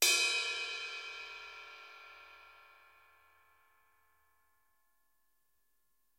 prac - ride bell loud
drums, percussion, cymbal